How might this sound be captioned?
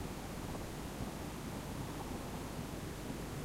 Cass 011 A TapeNoise01
While looking through my old tapes I found some music I made on my Amiga computer around 1998/99.
This tape is now 14 or 15 years old. This is the sound of tape noise in a blank space between two songs.
Recording system: not sure. Most likely Grundig CC 430-2
Medium: Sony UX chorme cassette 90 min
Playing back system: LG LX-U561
digital recording: direct input from the stereo headphone port into a Zoom H1 recorder.
chrome, noise, Amiga, cassette, Amiga500, hiss, collab-2, tape, Sony